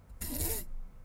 Backpack Open / Backpack Zipper
A sound of a backpack being opened. This sound was created by scratching my finger over my pop filter.
Zipper-Sound, Zipper, Backpack